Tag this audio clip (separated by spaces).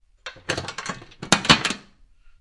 basket bread close kitchen metallic